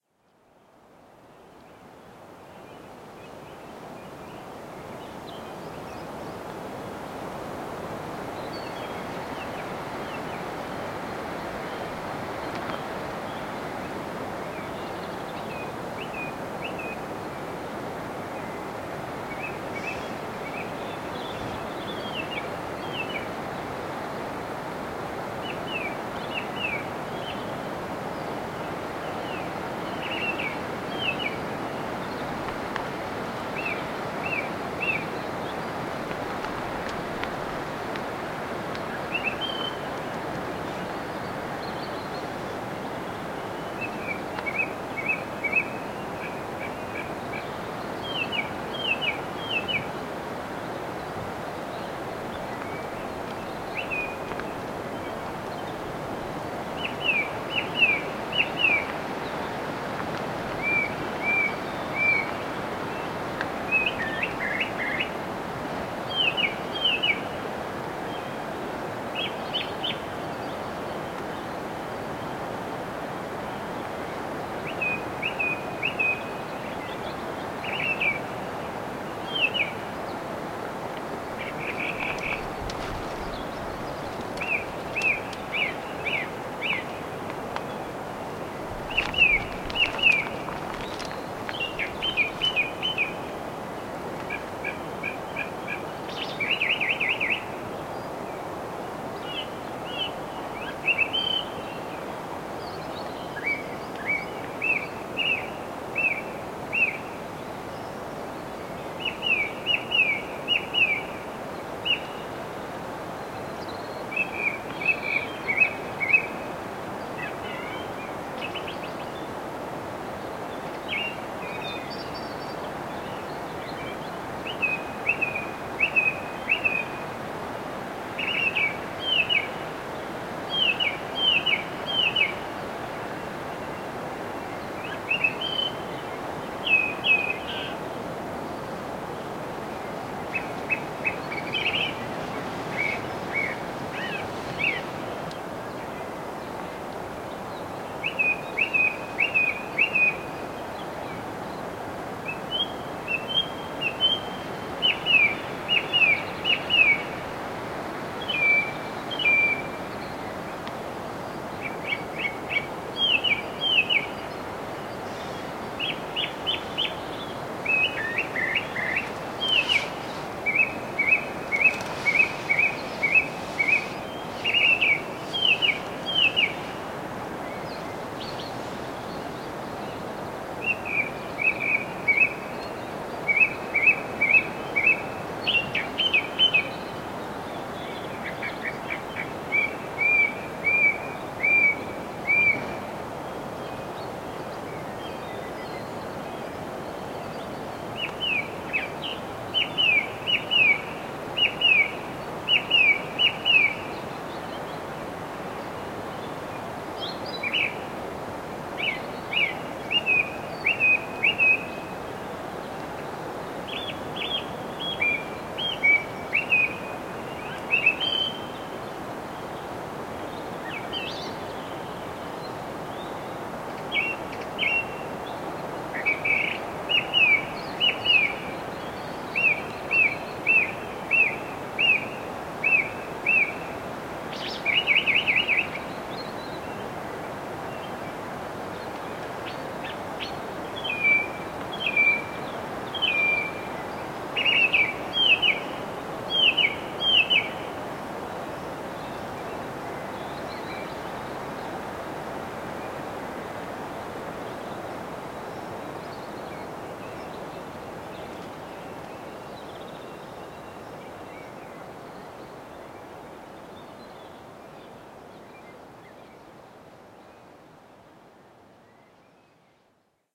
Song-Thrush
Song thrush singing on a windy morning.
birds, bird-song, dawn, field-recording, song-thrush